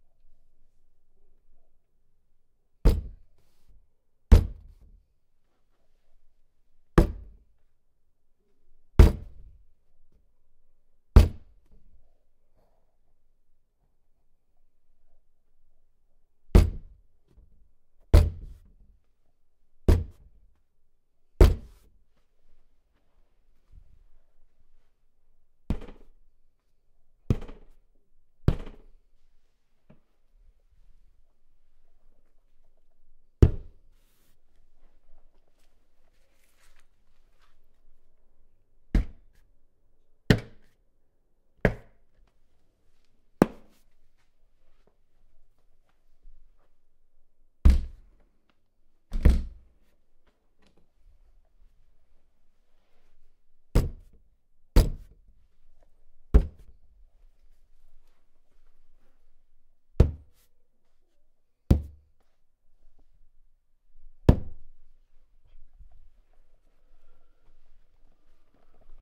Banging Wall
Just some light hits on doors and walls.